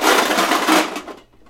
aluminum cans rattled in a metal pot
aluminum, cans